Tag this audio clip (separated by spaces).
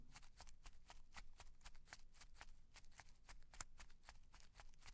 Animal Paper step stepping